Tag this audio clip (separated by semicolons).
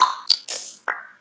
height; speed